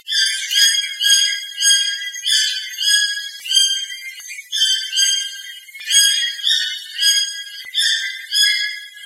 red billed toucan
Recording of a Red-billed Toucan. This has been filtered to remove people talking, but the bird calls are still clear. Recorded with an Edirol R-09HR.
aviary, birds, toucan, tropical, zoo